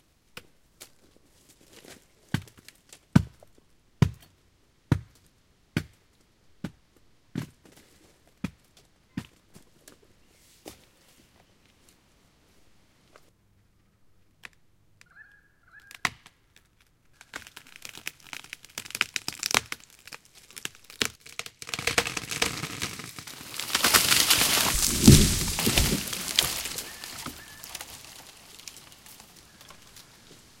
falling gumtree
Large White Gum being felled in australian bush. Recorded with H2Zoom.